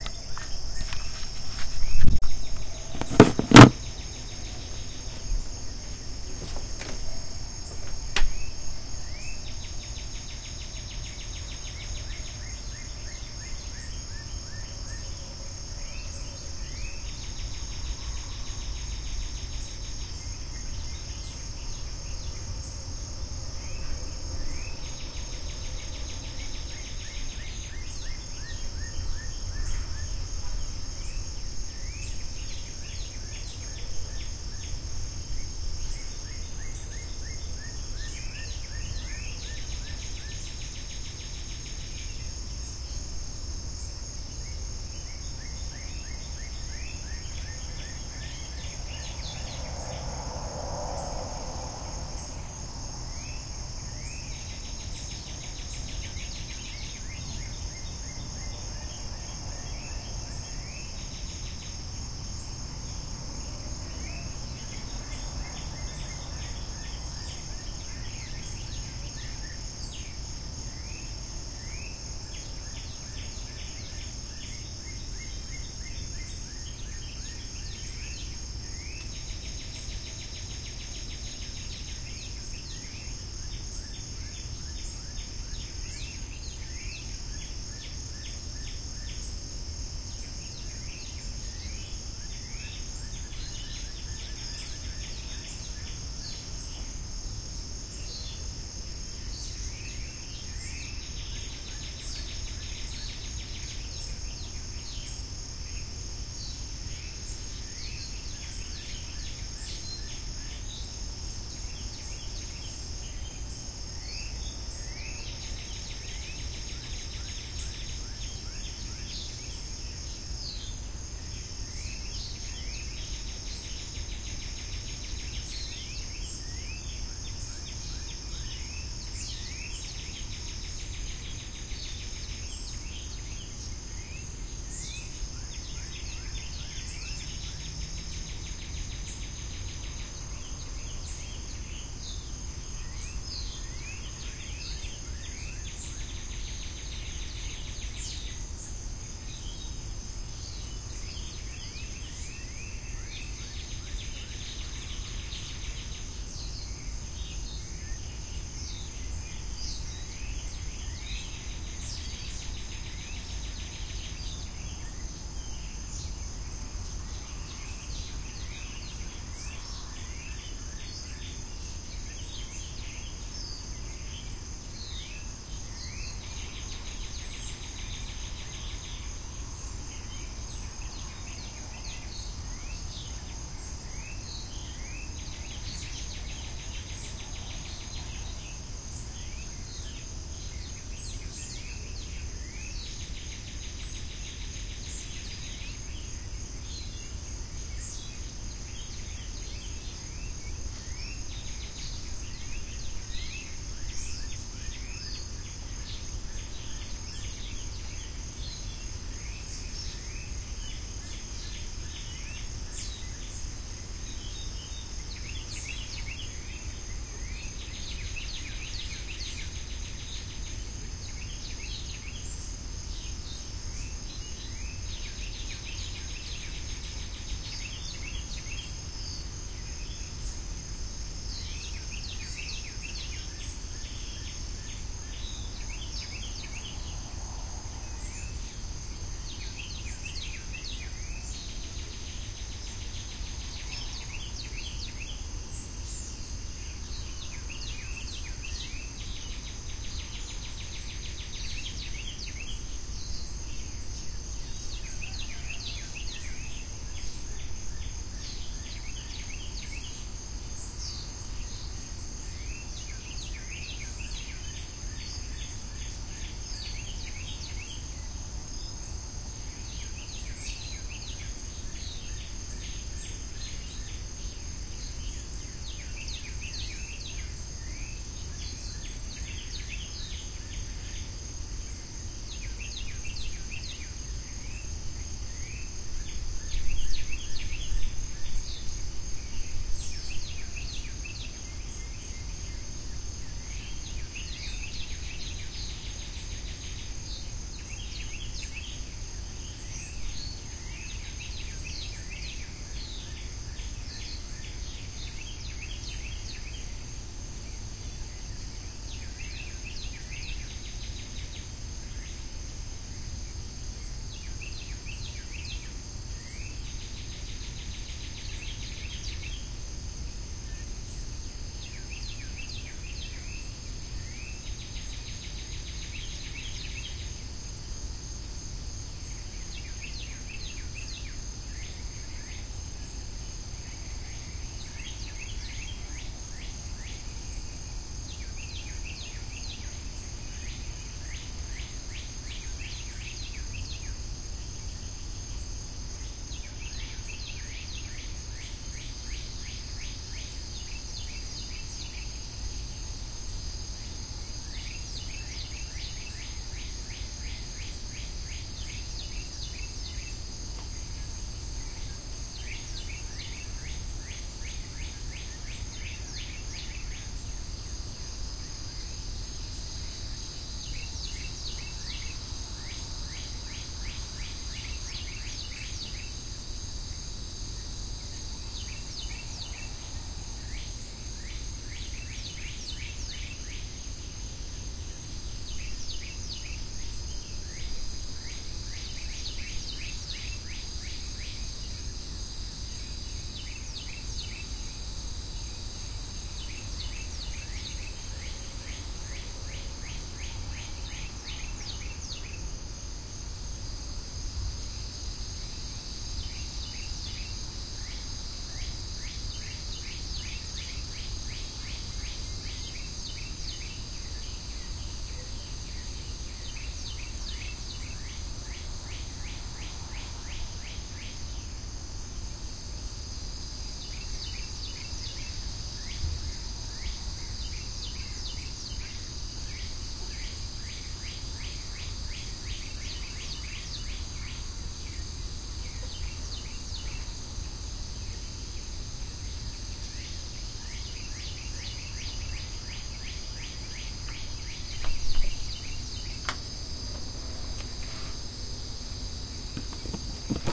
Early Morning Birds Waking up and Cicadas
This was recorded just as it was getting light before sunrise. Nature at its best. Location in south Georgia. Nature, birds, and cicadas.
cicadas, birds, dawn, nature, Before, up, waking, deep, south